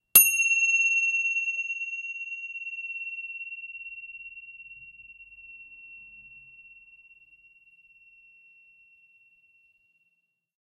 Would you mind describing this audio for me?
FDP - Tibetan Bell - Chime 02
Tibetan, Bell, Chime